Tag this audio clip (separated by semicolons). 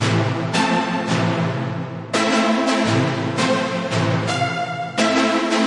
music-loop; trap-music